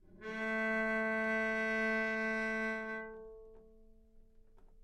Cello - A3 - other
Part of the Good-sounds dataset of monophonic instrumental sounds.
instrument::cello
note::A
octave::3
midi note::45
good-sounds-id::455
dynamic_level::p
Recorded for experimental purposes
A3, cello, neumann-U87